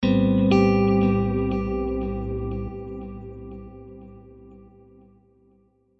Amin9 Guitar chord 120bpm
Amin9 chord with reverb and delay
ambient, chord, delay, guitar